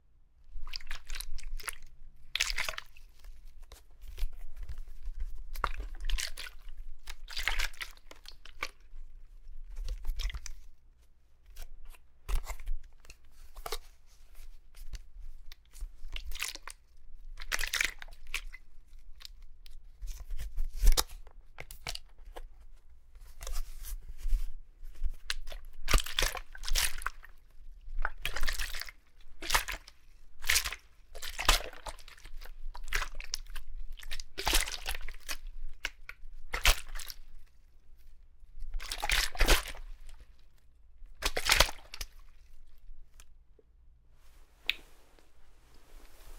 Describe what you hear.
water spritzer bottle handling water slosh
bottle, handling, water